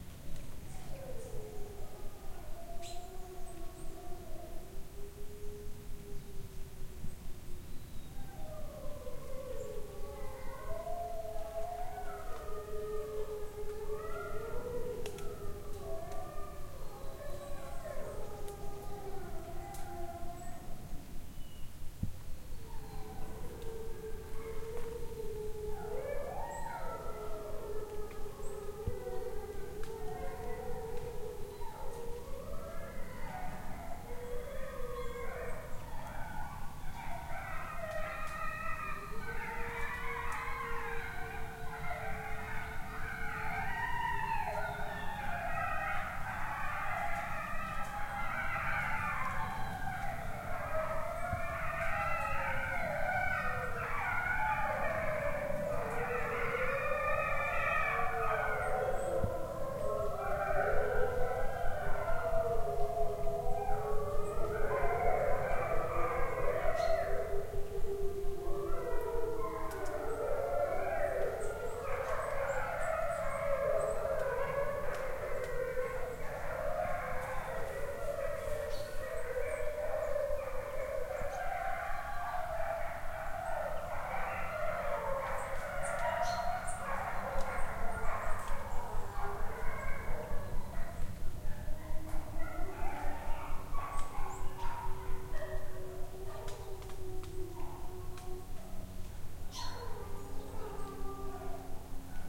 Wolfes howl howling Wolf Pack heulen
Pack, Wolf, Wolfsrudel, Wolves, howl, howling